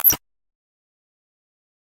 Attack Zound-103
Some high frequency electronic noise. Short and usable as a sound for clicks'n'cuts. This sound was created using the Waldorf Attack VSTi within Cubase SX.
electronic, glitch, high, high-frequency, noise, soundeffect